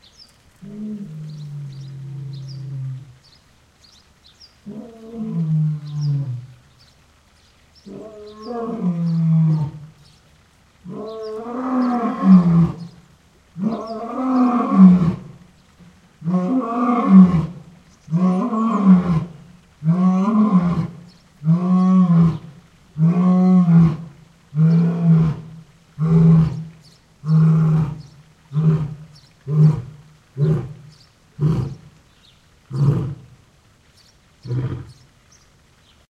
Lion roaring
You hear a lion, raven and some water.
bellow, cat, field-recording, lion, lions, roar